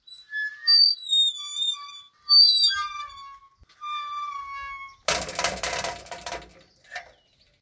A squeaky metal door. Recorded with mobile phone.
iron, squeak, door, hinge, close, metal, gate, squeaky, open